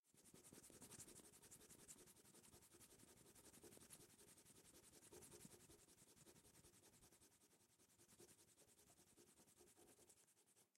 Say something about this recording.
sound of an eraser which erases on a paper (say what?)